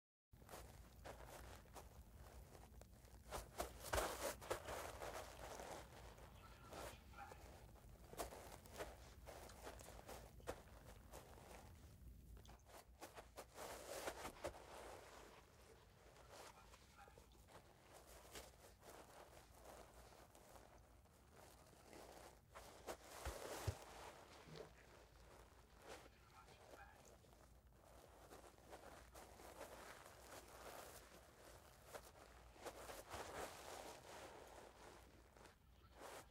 Foley Grass Foot steps
Foley sound effects of walking on grass
Foot-steps, Grass, Walking-outdoors